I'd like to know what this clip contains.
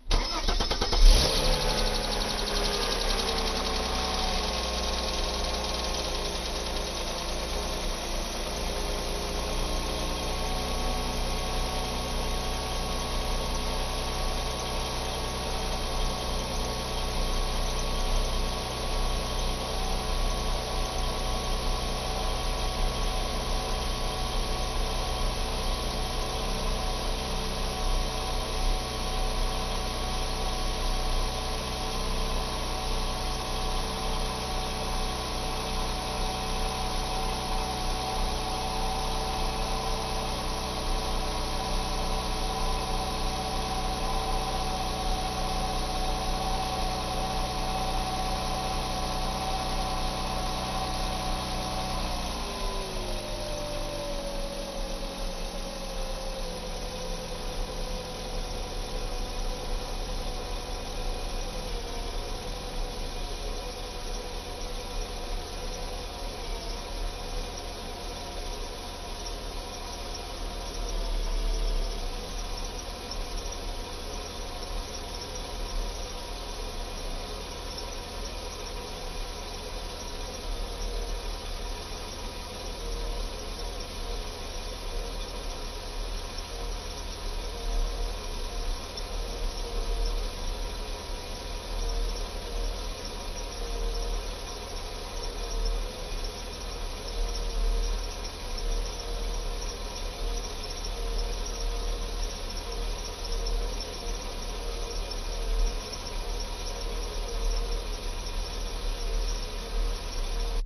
Stsrting a 280 HP 6 cyl motor. After about 1 minute the computer regulation lowers the rpm to an idling rpm.
motor, Benz